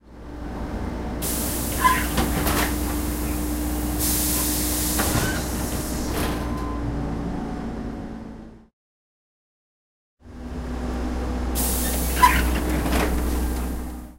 A dooor in old trolleybus